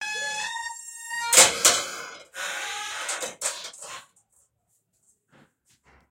Metal Door Opening & Closing Horror Sound (2)
Metal Door Squeaking opening and closing pt.2
Horror, Close, Door, Gate, Opening, Creak, Open, Garage, Creaking, Creepy, Metal, Squeak, Closing, Squeaking